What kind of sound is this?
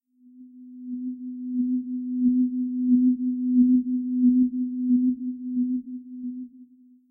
BONNEAU Alix 2016 2017 strange anxious synthetic sound
synthetic sound with wah wah effect
anxious, background-sound, drama, suspense, terrifying